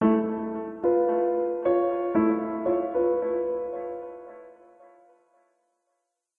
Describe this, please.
Happy and expectant phrase, part of Piano moods pack.
delay, expectant, happy, merry, phrase, piano, reverb